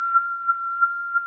Radar, Sonar, Scan
Suggestions: Radar, Scan, Sonar. Me whistling with wah-wah effect.